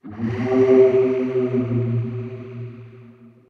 Created entirely in cool edit in response to friendly dragon post using my voice a cat and some processing. This dragon ingested psychotropic isotopes...
dragon, processed